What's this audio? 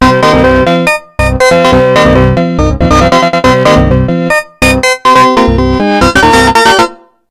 Tiny Piano Synth